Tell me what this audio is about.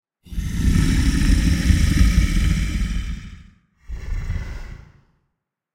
Deep Monster Growl
Multiple layers of downpitched vocals, recorded via Rode NT2A -> Focusrite Liquid Saffire 56.
Editing and Mixing in Ableton Live 9.
beast, creature, deep, demon, growl, growling, monster, roar, scary, snarl, sub